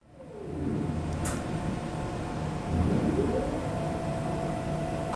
3D Laser-Sintern Printer (TU Berlin, 2013)
3D Laser-Sintern Printer in Actin
mono, electronic, condenser